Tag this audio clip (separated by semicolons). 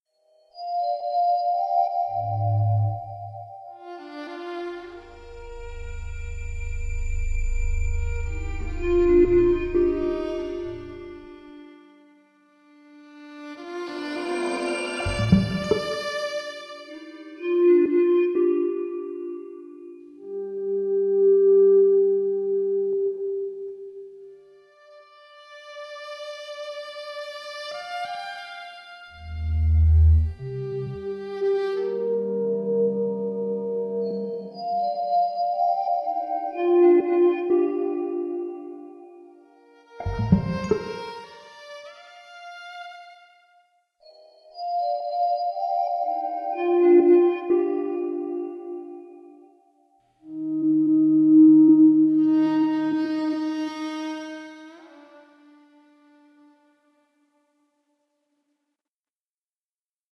Aalbers; Music; Soundscapes; Sagrera; SaraFontan; OST; Calidoscopi19